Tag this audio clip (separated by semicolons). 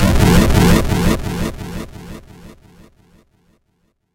computer; digital; electric; freaky; future; fx; fxs; lo-fi; robotic; sound-design; sound-effect